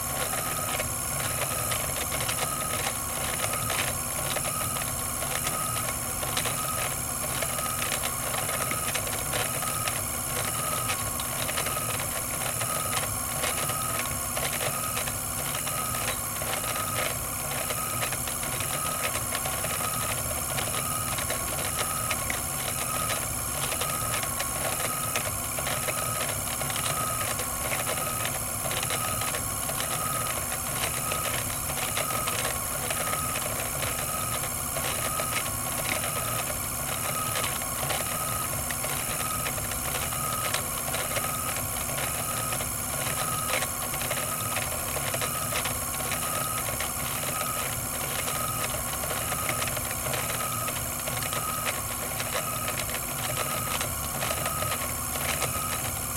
gears Mitchel animation table mechanism turn metal rattle3
animation, mechanism, metal, rattle, table, turn